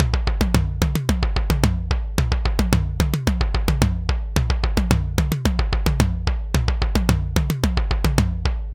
A dense, danceable tom-tom groove at 110bpm. Part of a set.
drumloop, 110bpm, tom-tom